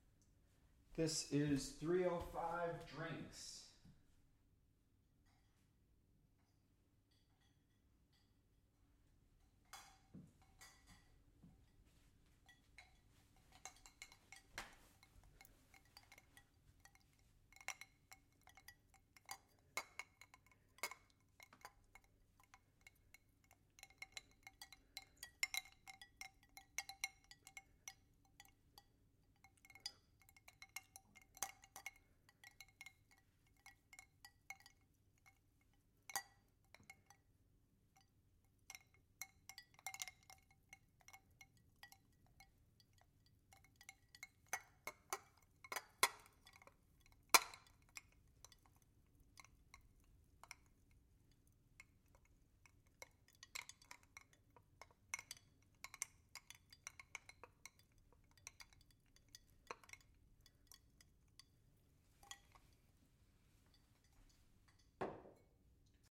Carrying drinks
Carrying three glasses of ice water.
AT4040 into Focusrite Scarlett
glasses, cubes, ice, liquid, glass, drinks